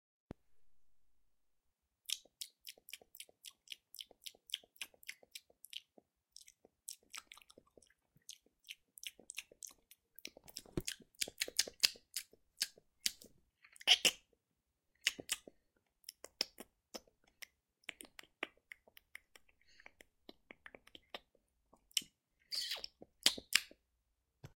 one boi smacking their lips after 1 very tasty sausage roll.